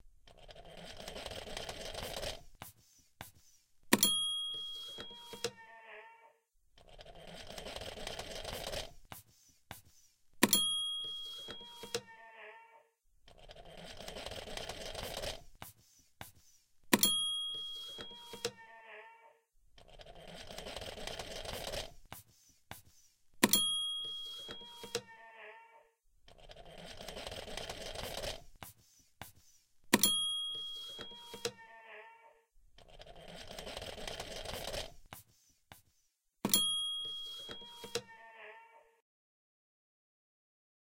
34 hn catmachine
Funny machine sound; machine to make cats to scare rats away without an actual cat. Made with a stapler, a water bottle, a wrench a paper trimmer and a voice imitating a cat.
funny-machine, cat, machine